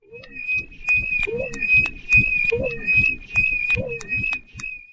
TONNA Julie 2015 2016 SpaceSounds-2
Theme : Not from the planet earth
Sound : Created with Audacity
Contents : two recorded sound that are modified
Pist 1 : recorded sound
An object striking metal regularly
Volume : 0dB
Copied the sound and put it just after in order to be longer (total : 5sec)
Effect : Noise reduction (noise reduction : 25db, sensitivity : 8, frequency smoothing : 0),
Pist 2 : recorded sound
Metal squeaking
Volume : +10dB
Copied the sound 4 times to have the same lenght as the first one, and merge them to be just 1 sound
Speed : x0,521 (to be the same lenght as the first sound = 5sec)
Copied the sound, inverse it, put it after the original one, and merge
Effect : Phaser (phases :12, dry/wet : 211, frequence LFO : 2,5, phase de départ LFO : 50, profondeur : 230, retour : 40%), Fade In, Fade Out
Typologie (Cf. Pierre Schaeffer) :
X’ (impulsion complexe) + V ( continu varié)
Morphologie (Cf. Pierre Schaeffer) :
1- Masse : Son seul complexe + son cannelé
2- Timbre harmonique : Acide